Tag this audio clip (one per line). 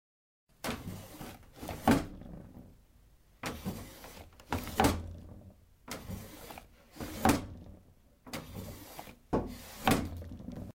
Closet
Drawer